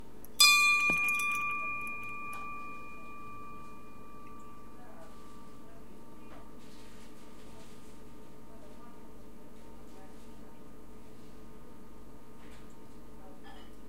Ring bell Morskoi Volk bar1
Ringing bell in the bar "Morskoi volk" ("Sea dog"). Novosibirsk, Academ gorodok.
Recorded: 2013-12-11.
Recorder: Tascam DR-40.